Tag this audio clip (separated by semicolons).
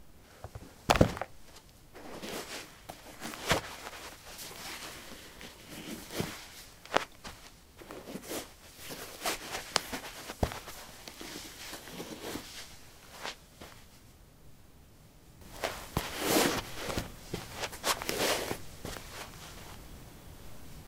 footsteps; footstep; steps